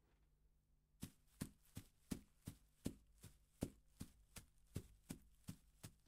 Steps of a Man in Leafs v2
Just someone stepping over leafs
Leafs, Walking, Field-Recording, Foley, Footsteps, Walk, Steps, grass